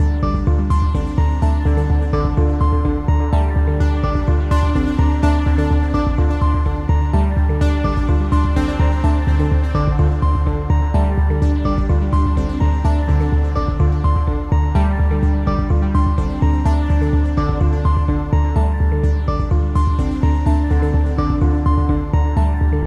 126 Num Fireflies DAFA#C.2a 001
A more complex melodic sequencer loop I made with my synths